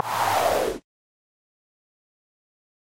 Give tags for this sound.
halt; lose; decrease; pause; power; stop; brakes; slope; down; decelerate; loss; energy

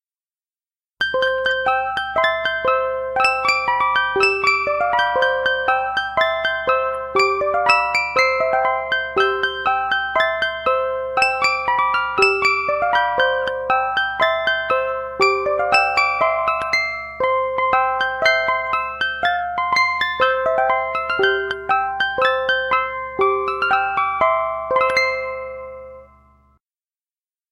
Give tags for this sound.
chimes; old

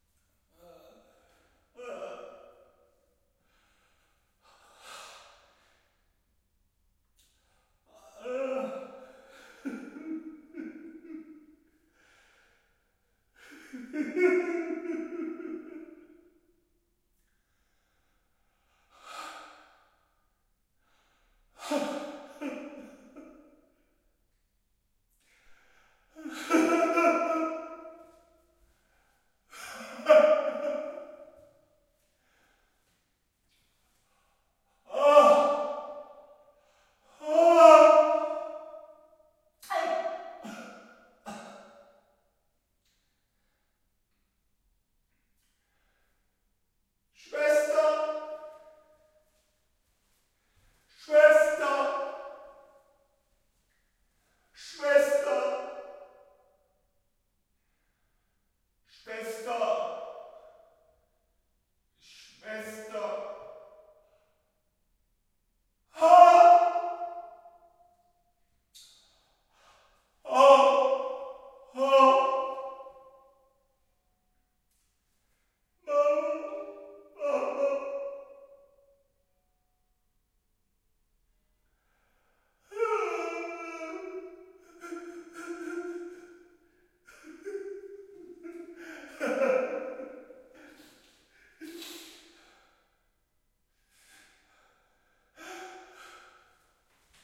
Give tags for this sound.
pain; ambulance; ache; whimpering; war; madhouse; men; hospital; cry

